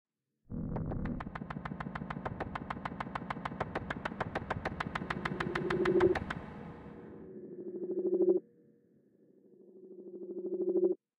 Created in FL Studio 20.
"Alarm" as it was originally labeled.
futuristic, atmospheric, sci-fi